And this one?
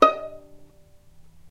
violin pizz vib D#4
violin pizzicato vibrato
vibrato, violin